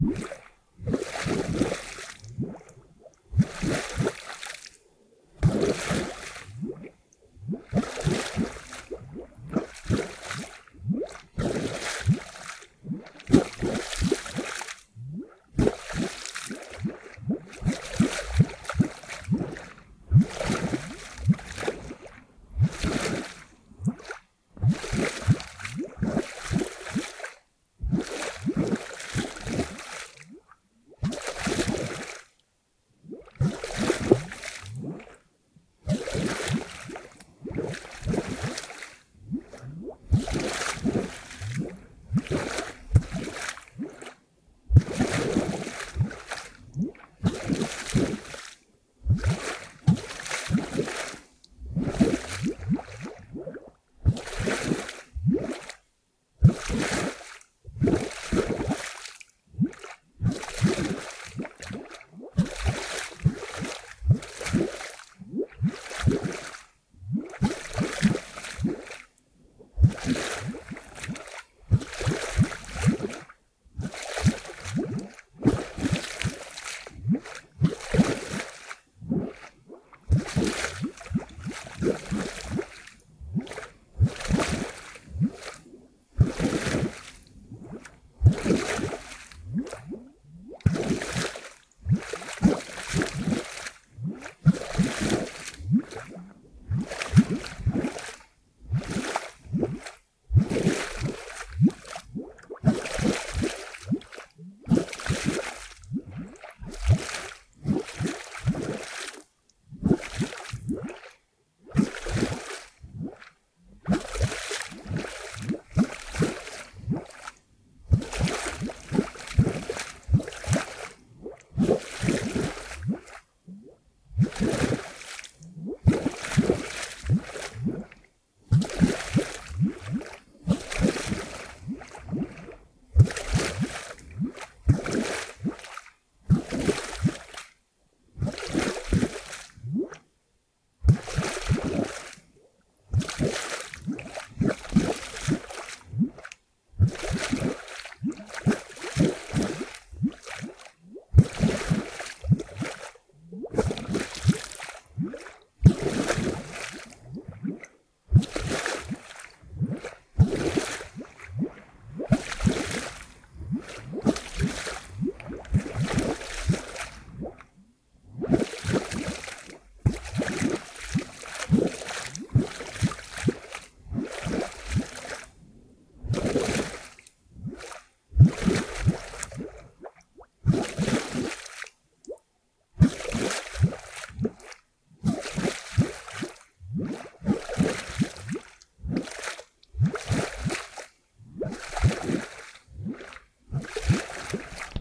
185071 oneirophile swamp-gas-bubbling Cleaned
I took Oneirophile's file "Swamp Gas Bubbling" and removed most of the background noise.